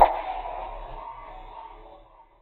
Strates Perc Labo
Different sounds mixed together.
beep, synth, wave